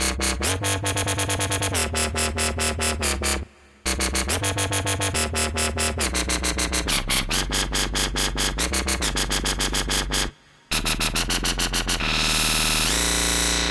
dubstep wobble bass 140BPM #3
Some nasty wobble basses I've made myself. So thanks and enjoy!
dubstep, dub, dubby, filthy, dirty, wobble, gritty, grimey, bitcrush, drum, dark, drumnbass, loop, grime, filth, dnb, drumstep, bass